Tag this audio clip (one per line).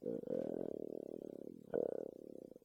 foley
stomach-grumble
stomach
tummy